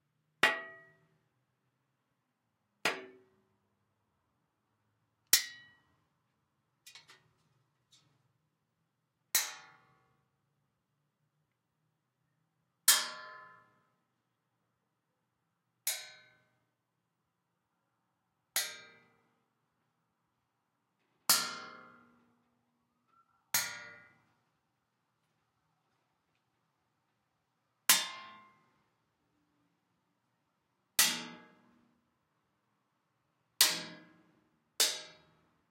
clang metal (hit)
Various metal hits, (clang) with an aluminium strip